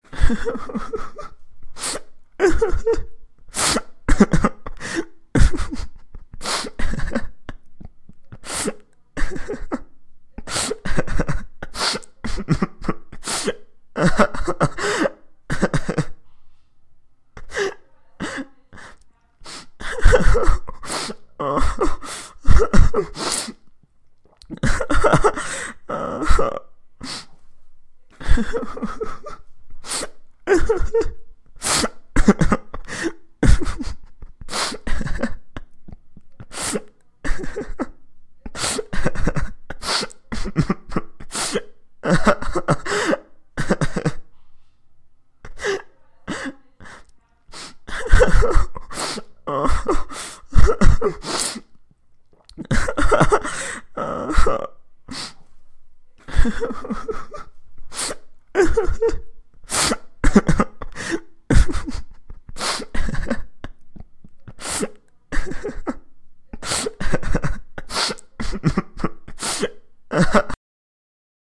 very annoying cry and some people cant even last 3 seconds listening
wake, acting, emotional, cry, annoying, crying, upset, hurt, weep, scared, sadness, tears, worried, sad, sound, whisper